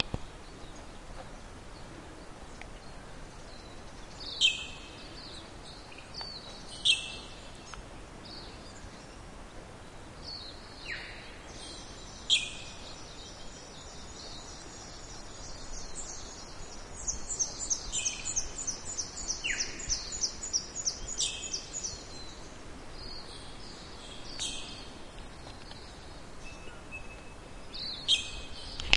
Lake St Clair 6
Birds in the forest near Lake St Clair, TAS, Australia. Recorded on LS10
atmospheric, australia, birds, field-recording, forest, tasmania